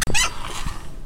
One of three chair squeak noises I recorded three years ago for a radio drama project. Best for turning or spinning.